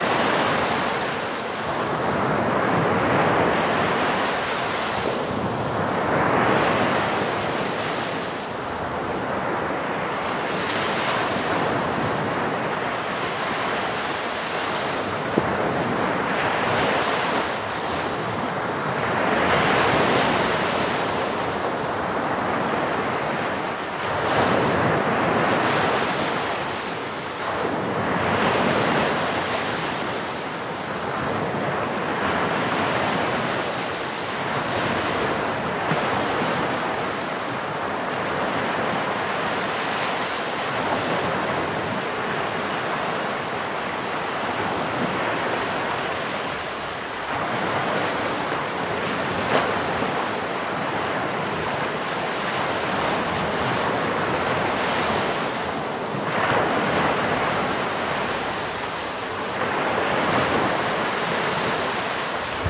Waves and Bubbles recorded on the shore. recorded with Zoom H1.
bubbles, sand, sea, shore